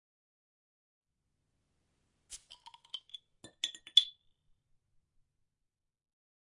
pub beer drink Panska CZ Czech Panská